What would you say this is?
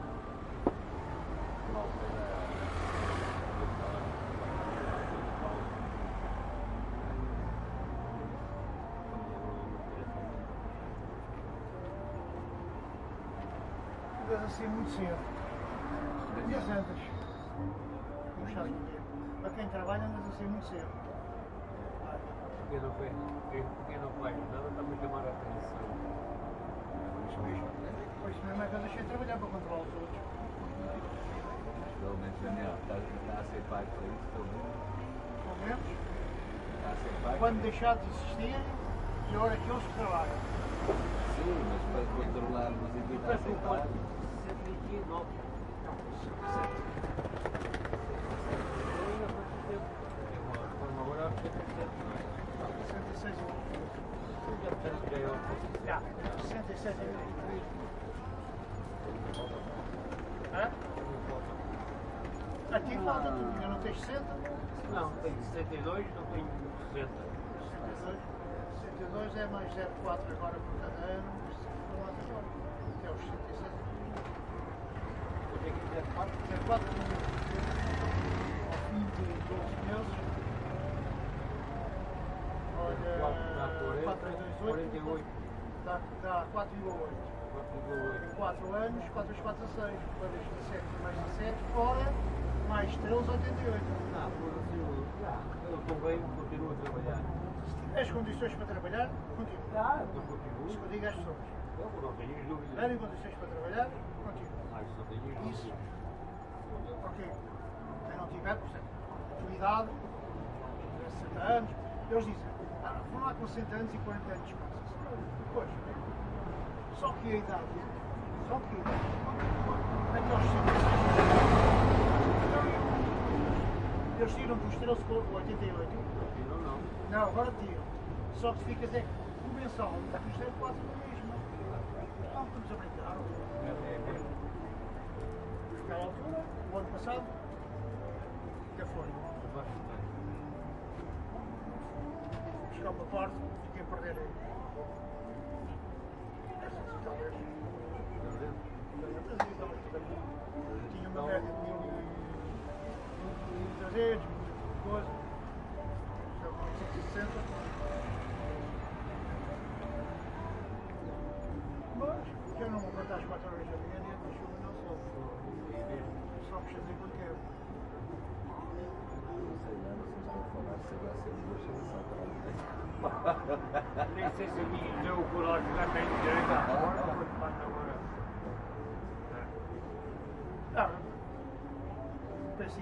Ambience EXT day cafe street traffic radio people talking chatter portuguese (lisbon portugal)
Field Recording created with my Zoom H4n with its internal mics.
Done in 5/2017
ambience, cafe, chatter, field-recording, h4n, lisbon, people, portugal, zoom